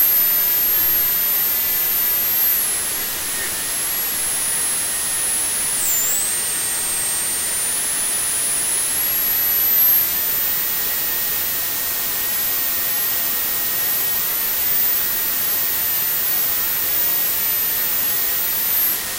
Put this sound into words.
high pitched interference with some white noise